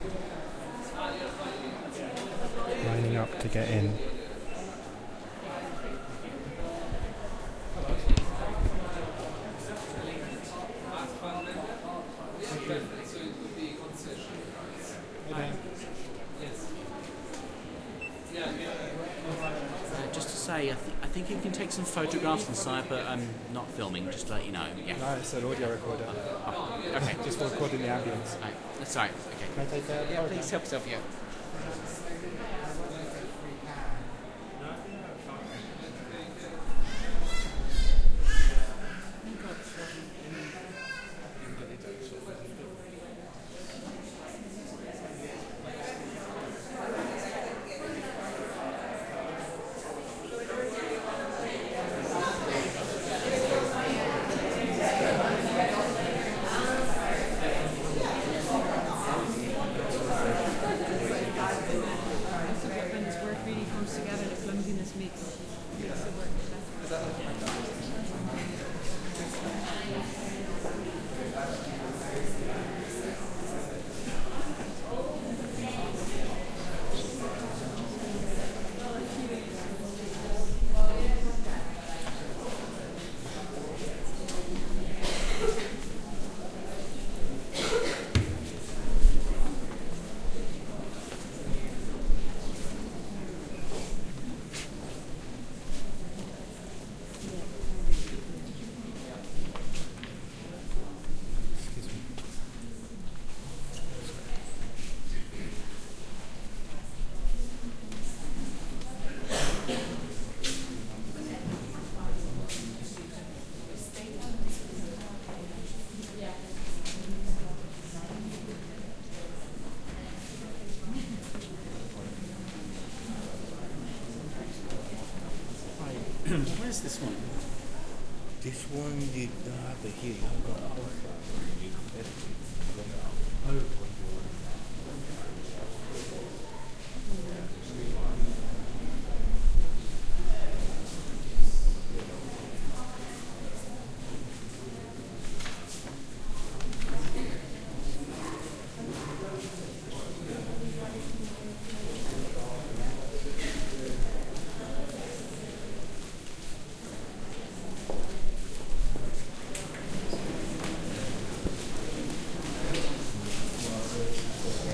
Walking in and around busy exhibition in Tate Britain
Gallery, Footsteps, Ambience, Art